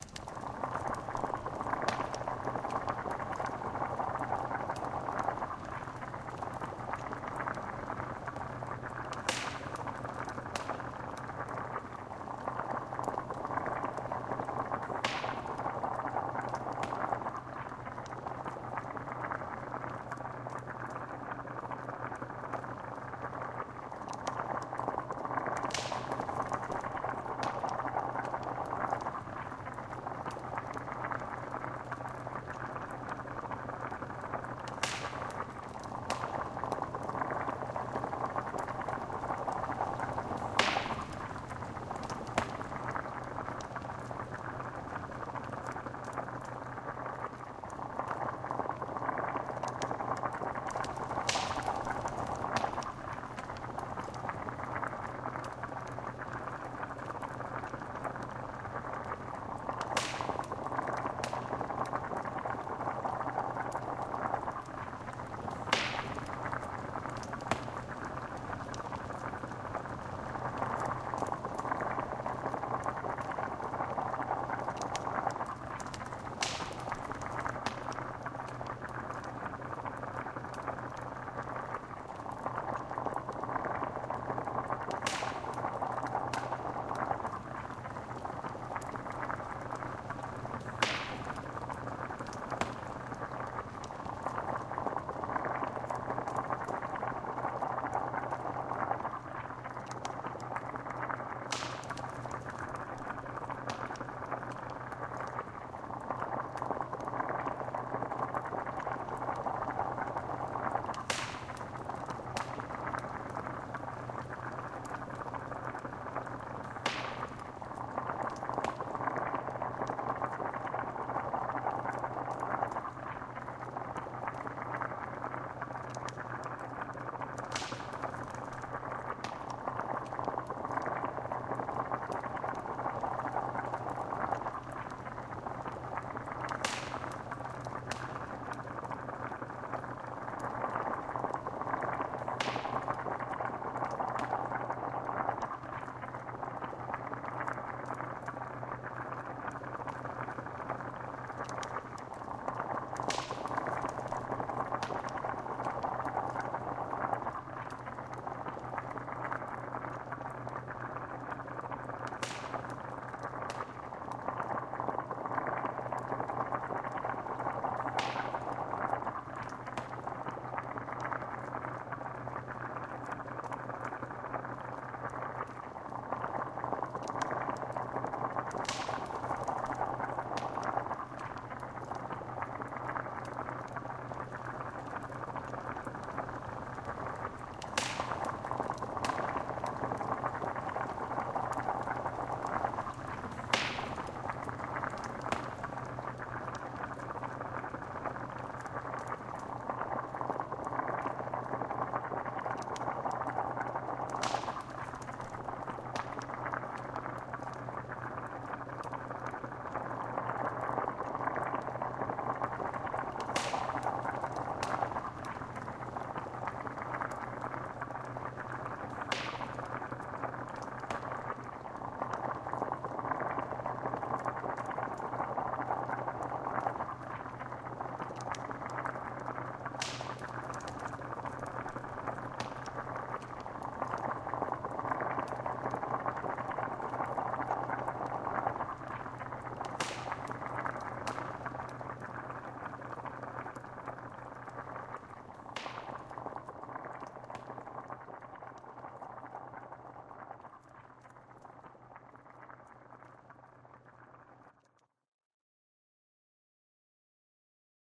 This is a bubbling cauldron created for the witches scene in a production of Macbeth, and was created by combining 19842_Jace_boiling_bubbles2 and 30322_pcaeldries_FireBurning_v2,and then processing and looping the resulting mix. Thank you Jace & pcaeldries!
bubbling, crackling, effects, liquid, noise, reduced, reverb, sound